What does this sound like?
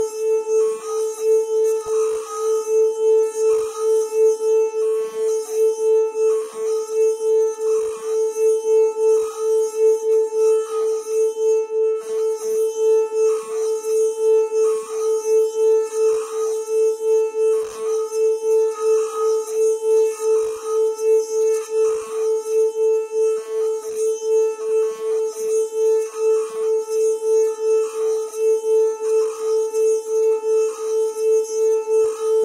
Wine Glass Sustained Hard Note A4
Wine glass, tuned with water, rubbed with pressure in a circular motion to produce sustained distorted tone. Recorded with Olympus LS-10 (no zoom) in a small reverberating bathroom, edited in Audacity to make a seamless loop. The whole pack intended to be used as a virtual instrument.
Note A4 (Root note C5, 440Hz).
tone, melodic, wine-glass, pressed, noisy, loop, note, pressure, hard, glass, water, tuned, instrument, texture, drone, clean, sustained